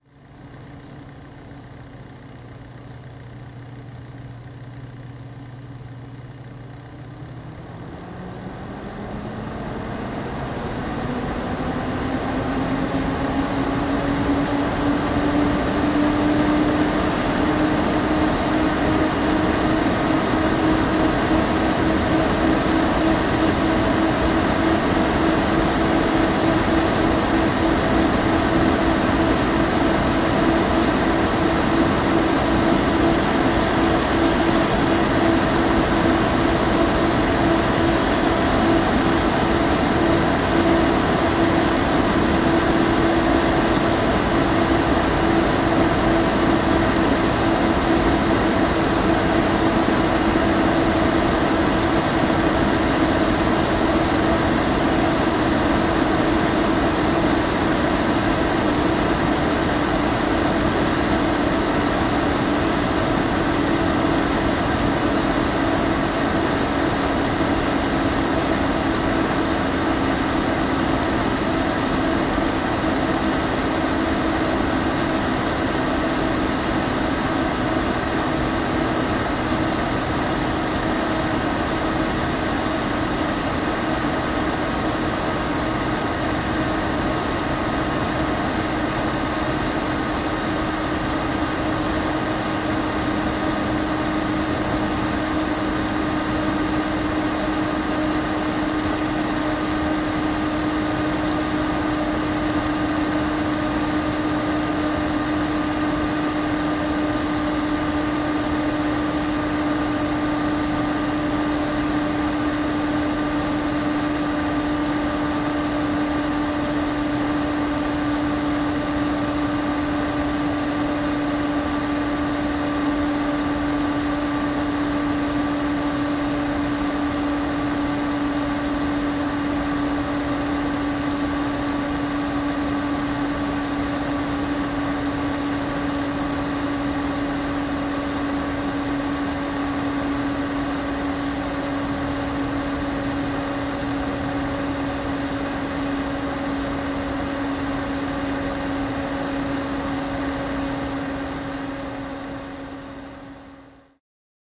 Mac Pro Fans Speed Up
A 2006 Mac Pro speeding up its 4 fans from 500 rpm to the maximum and then slowing down. Recorded with a Zoom H1.